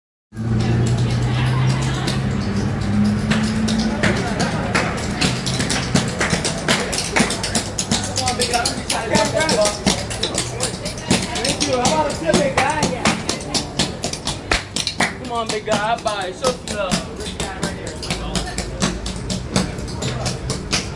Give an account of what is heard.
Tap dancers on the sidewalk
Tap dancers on Decatur Street in New Orleans on a Friday night.
city, street